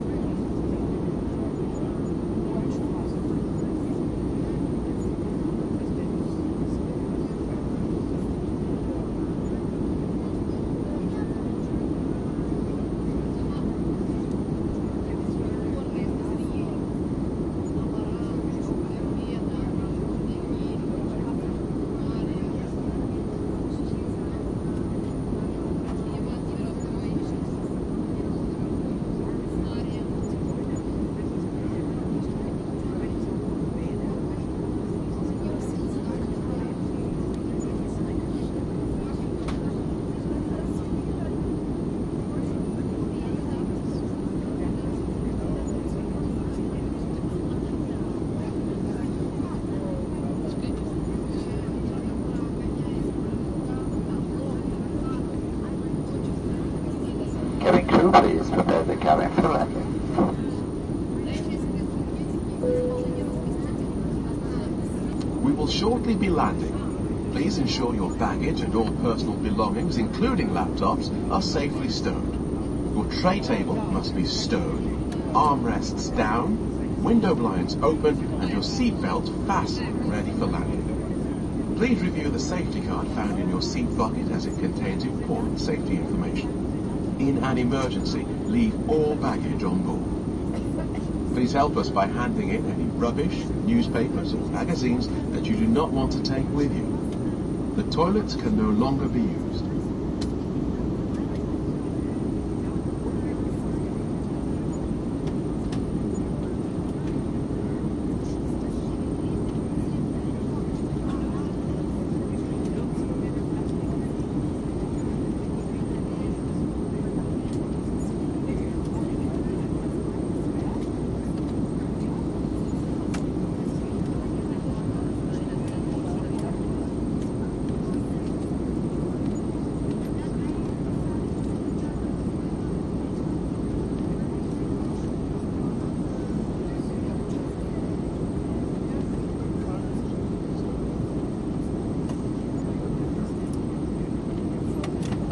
Inside an airplane, in flight, englisch announcements